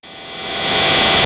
Reverse Cymbal
Arutria Drumbrute Analogue Drum Machine samples and compressed with Joe Meek C2 Optical compressor